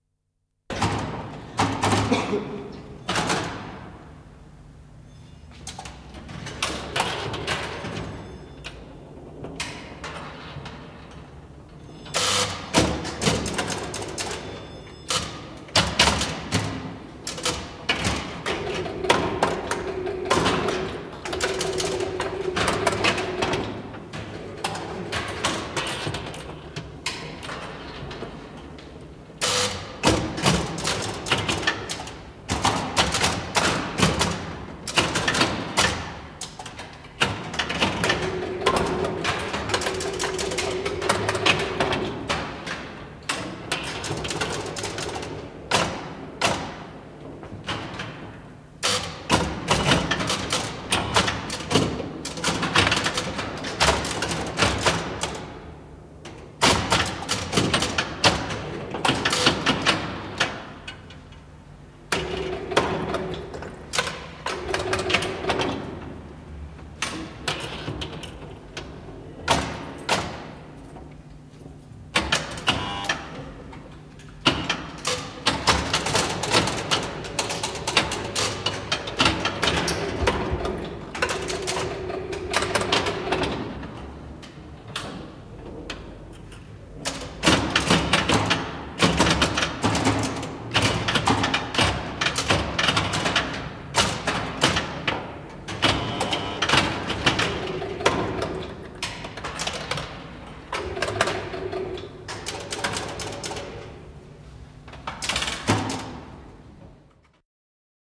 Flipperi, peliautomaatti / Pinball, an old pinball machine, 1960s, mechanical playing sounds in an echoing hall
Flipperi, 1960-luku. Mekaanisia peliääniä isossa kaikuvassa tilassa.
Paikka/Place: Suomi / Finland / Helsinki, Natsa (Nylands Nation)
Aika/Date: 17.02.1970
Field-Recording, Device, Games, Yle, Laitteet, Laite, Pelaaminen, Suomi, Peli, Pelit, Yleisradio, Pinball-game, Finland, Game, Arcade-game, Peliautomaatti, Finnish-Broadcasting-Company, Soundfx, Tehosteet